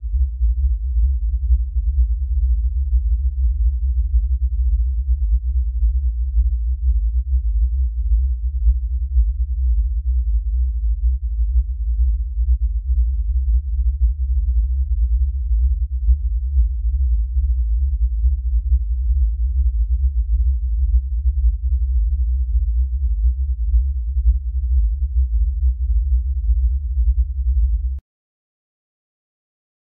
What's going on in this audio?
Another drone, very deep, made with Coagula Light.